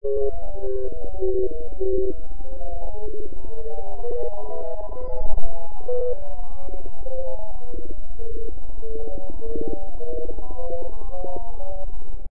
electronic beeping dely
beeping, delay, electronik, slowed-down, tuned-down